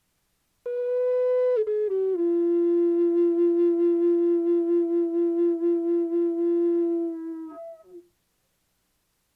flute sound that I use on PSM assigments.
monophonic, psm, flute